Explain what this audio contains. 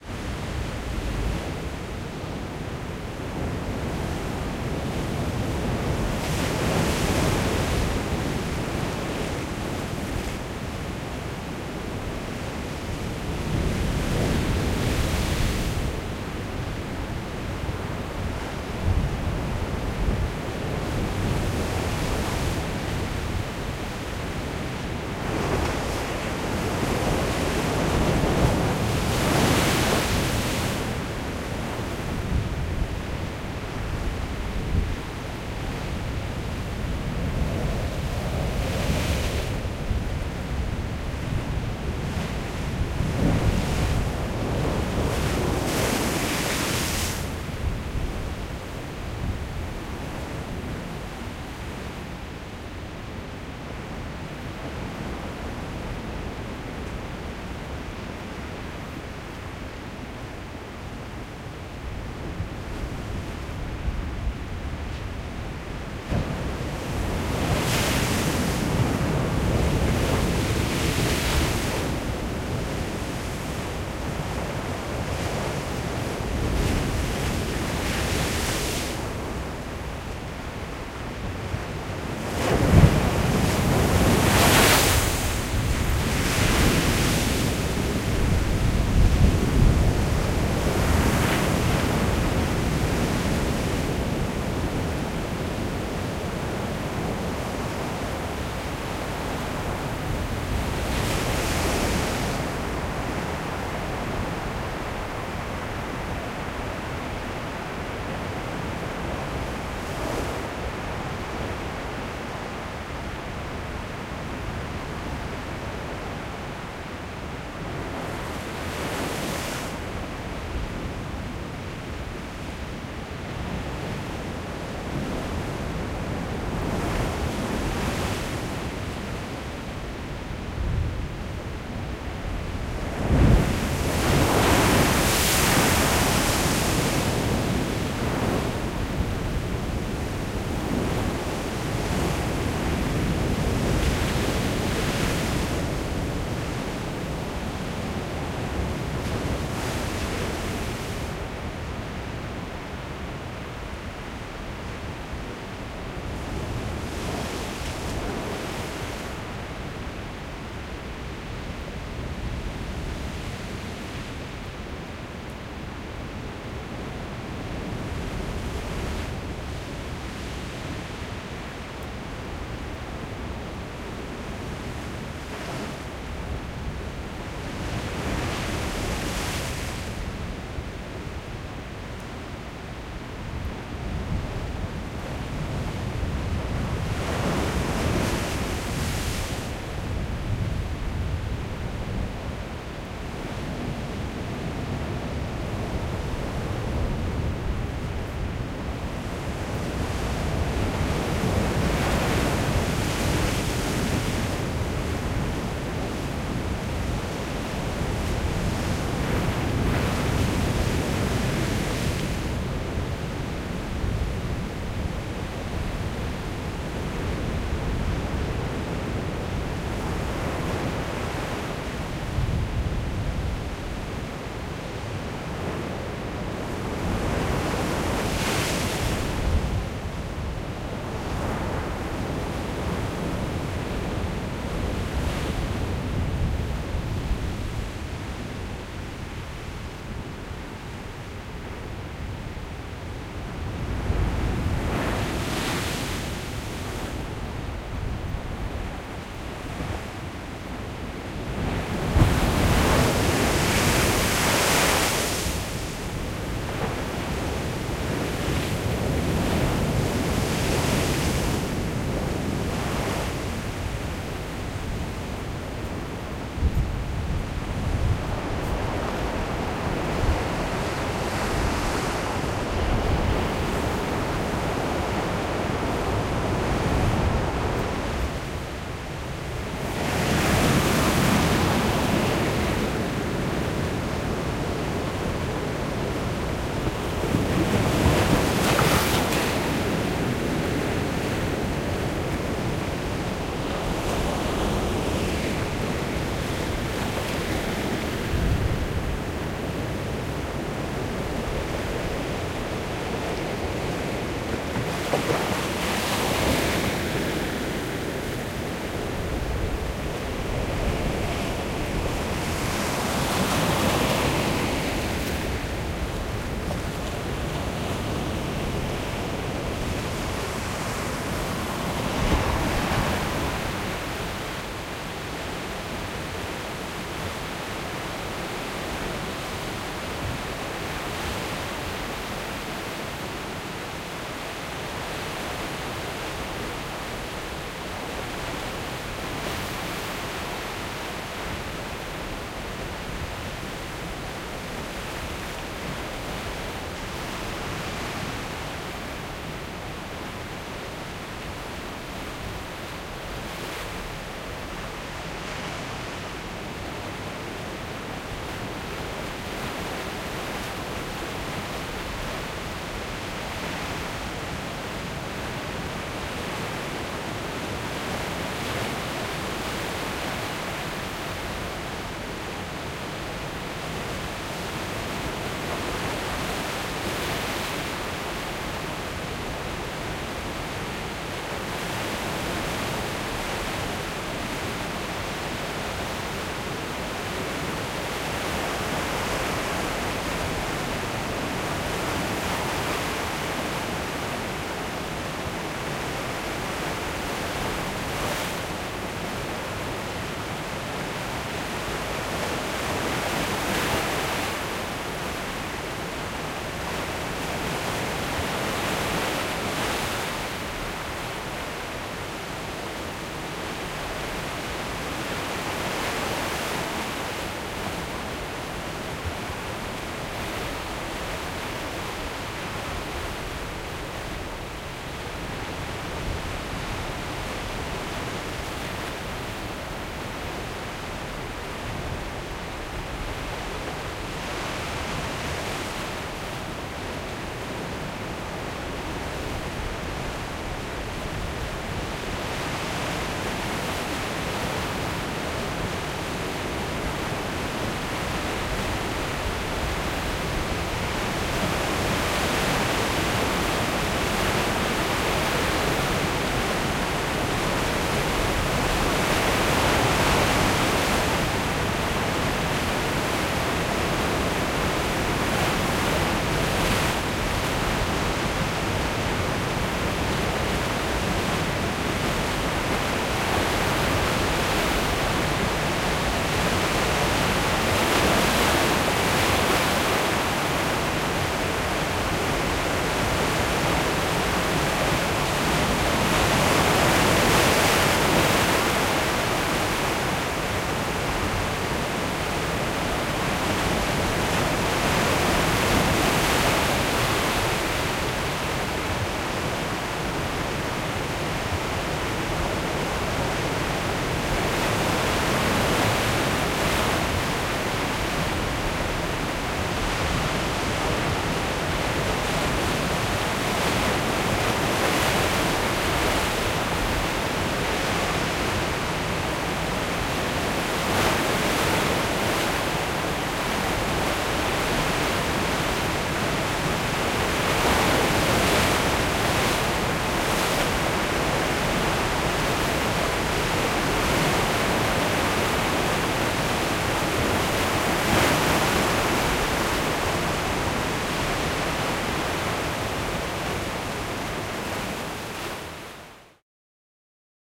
#SOUNDSCAPE
Storm in Vernazza [Italy, 2020-04-10] - recording of the Ligurian Sea taken in Vernazza one of the villages that make up the Cinque Terre.
Sztorm w miejscowości Vernazza [Włochy, 2020-04-10] - nagranie wzburzonego Morza Liguryjskiego u wybrzeża Vernazzy, jednego z pięciu malowniczych miasteczek składających się na Cinque Terre.
coast-path, Europe, waves, storm